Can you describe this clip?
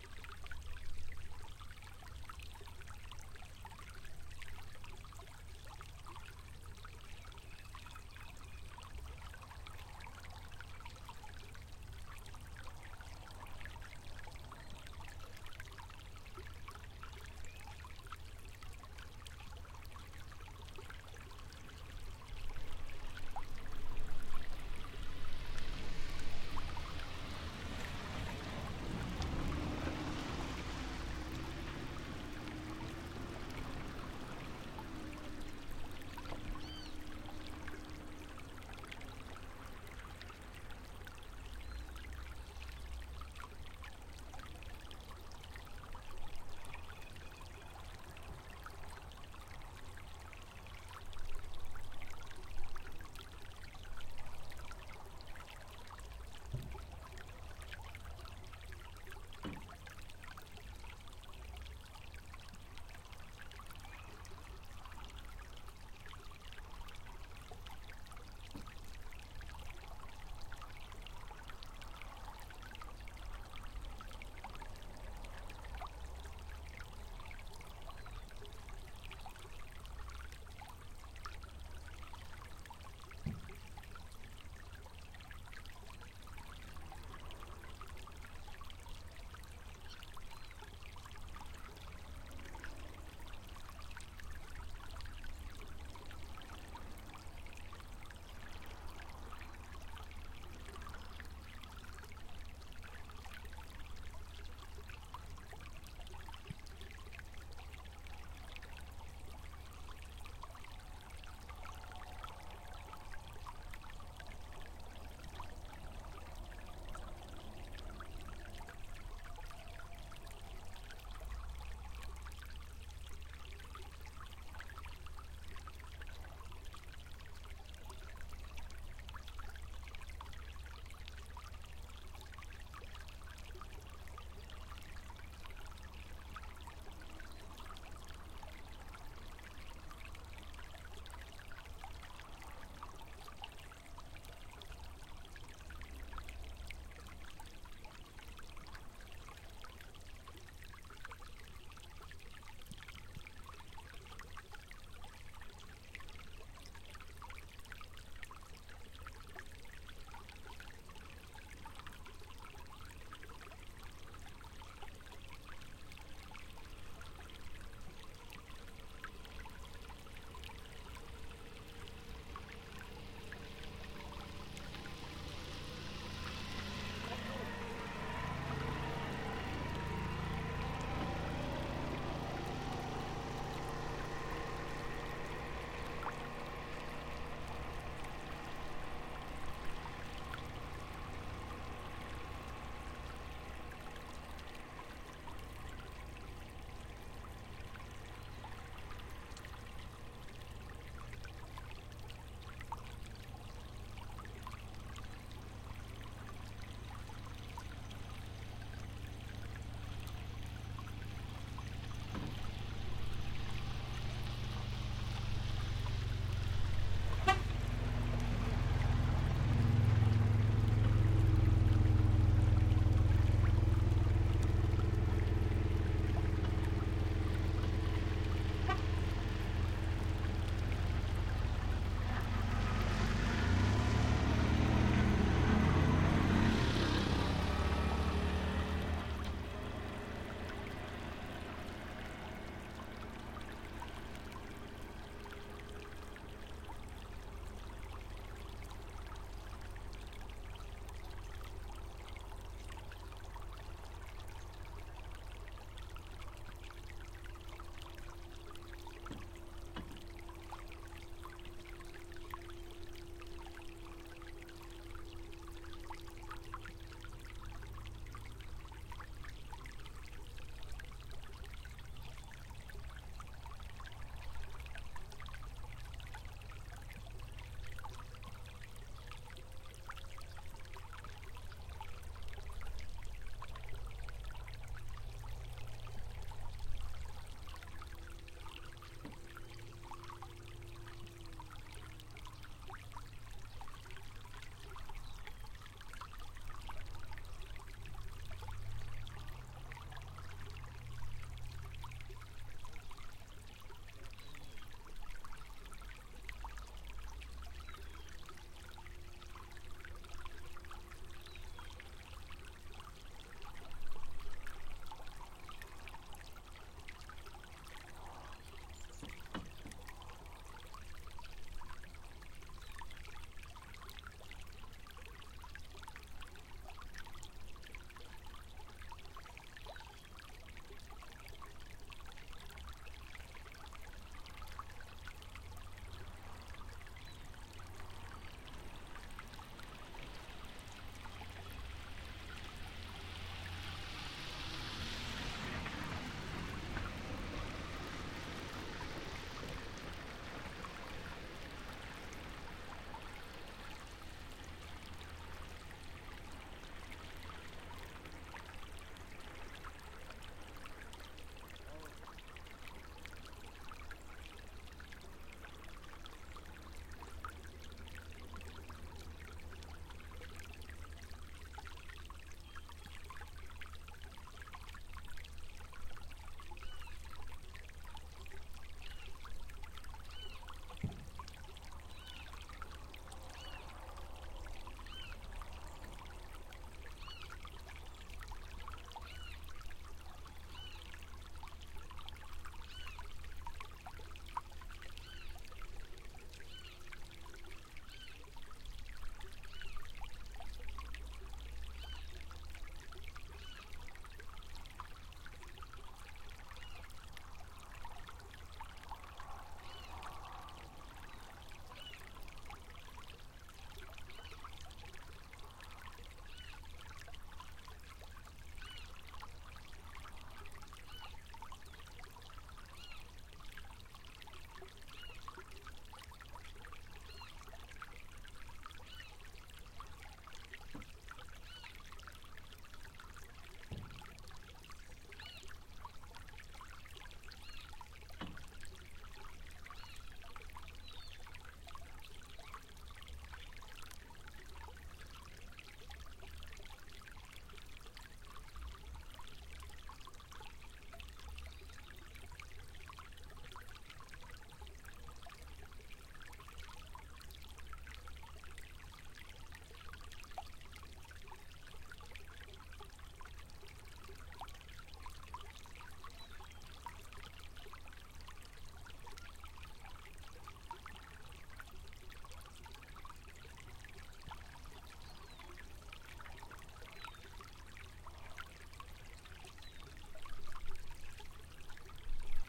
sonido de un pequeño rio a las afueras de un pueblo en Oaxaca, Mexico. a diferencia del anterior este es un chapoteo mas pequeño de la corriente
sound of a small river on the outskirts of a town in Oaxaca, Mexico. unlike the previous one, this is a smaller splash of the current of the river